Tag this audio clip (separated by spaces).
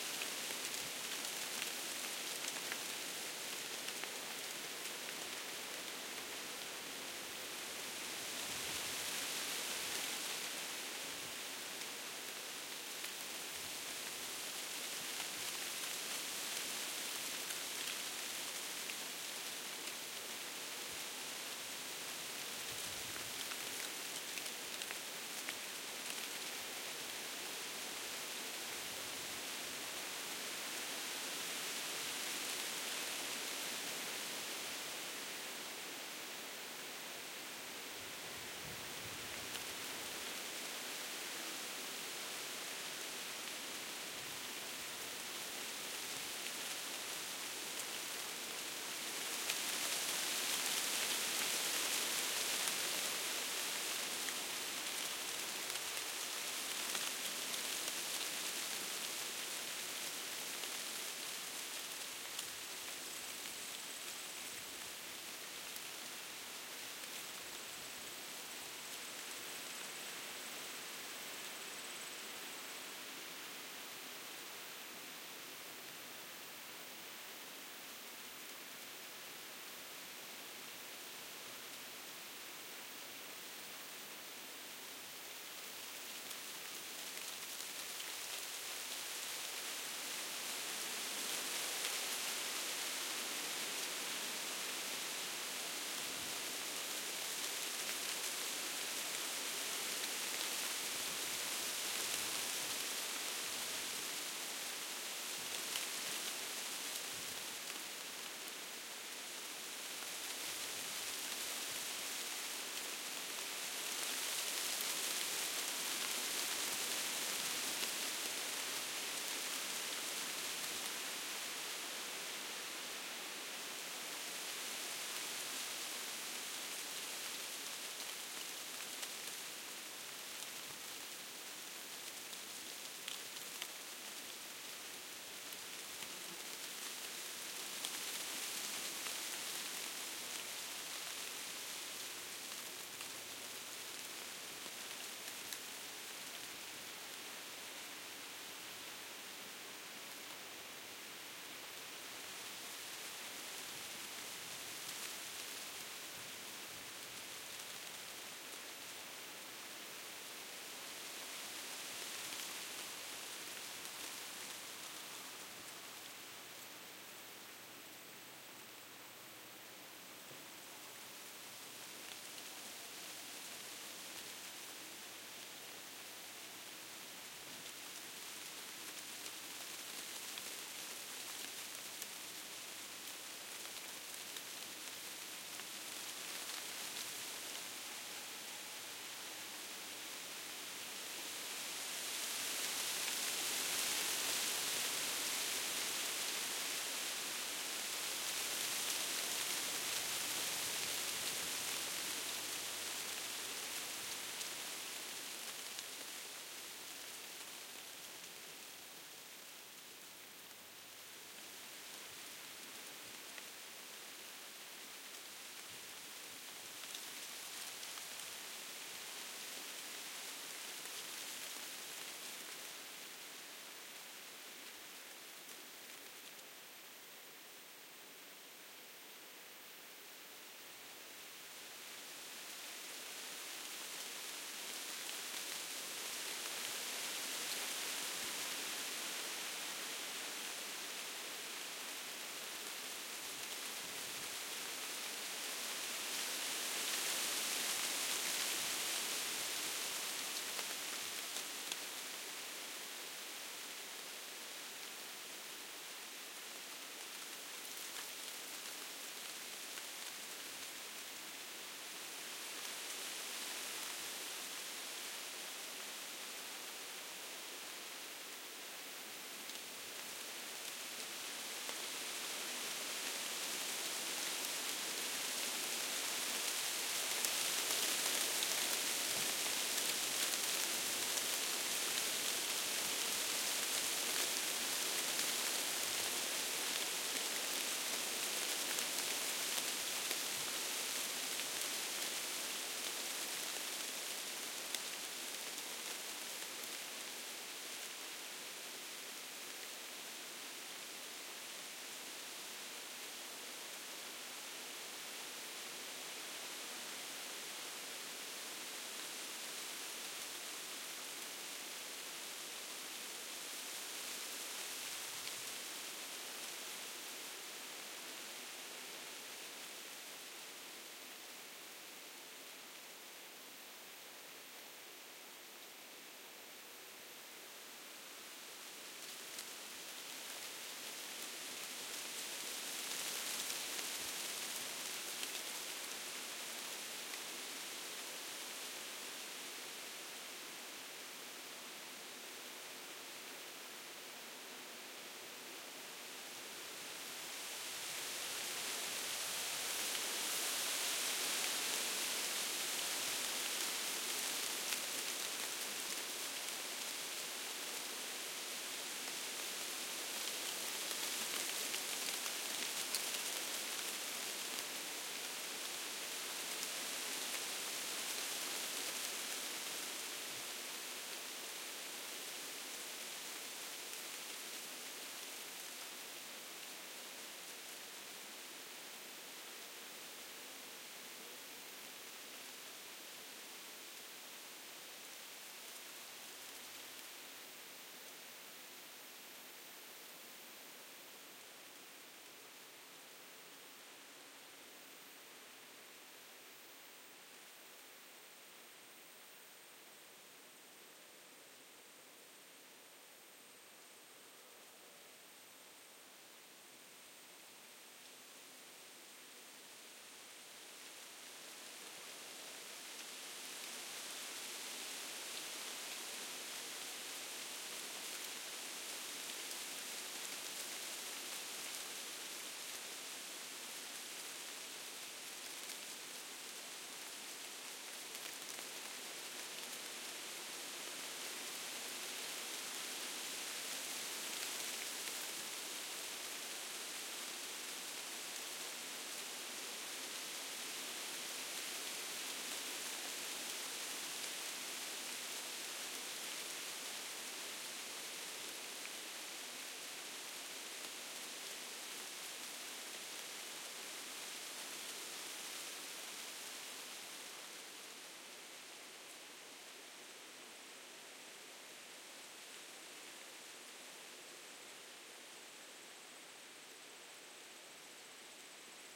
leafy wind through aspens